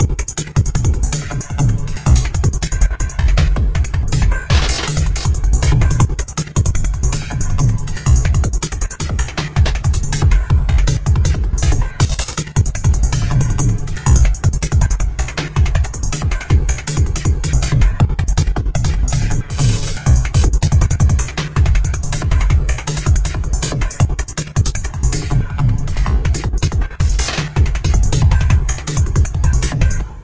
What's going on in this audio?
An acidized rhythm loop that has been mangled a bit.